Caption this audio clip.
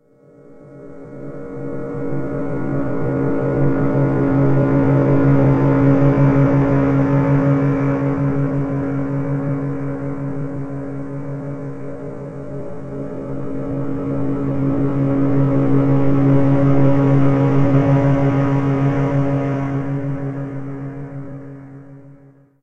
Porcelain Event count2
A doll which does whispers! SO IT IS! UNSETTLING ALSO!
scary, industrial, electric, spooky, noise, unsettling, effects